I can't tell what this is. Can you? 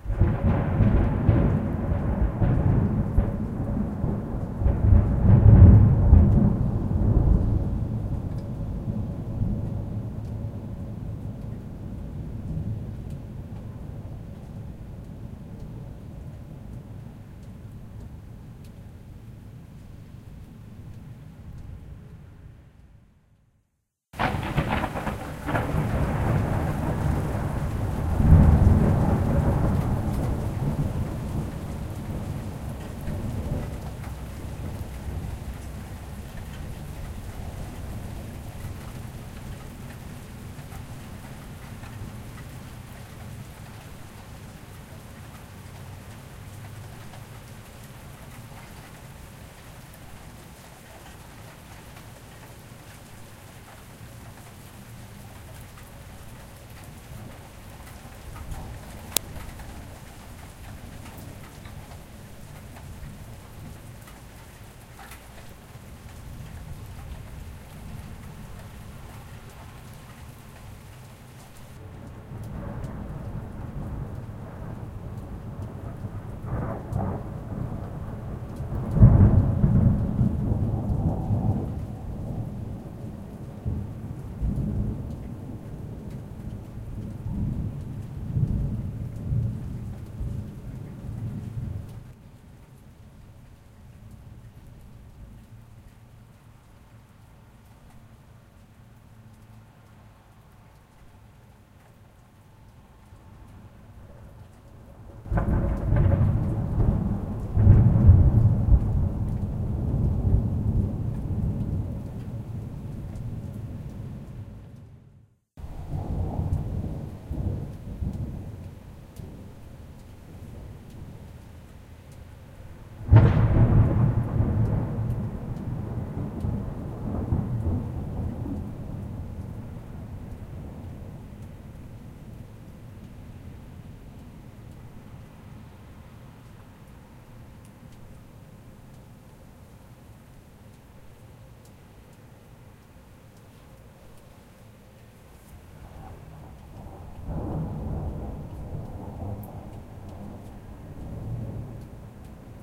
Thunder claps recorded in Alberta, Canada on August 29, 2013. This recording is edited from a single storm.